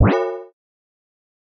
A swooshy wishy woshy wow zoom wooo sound. Weirdly eerie. Could serve as an artsy sword swing sound, or a success jingle for a kinda messed up game.
SPOTTED IN: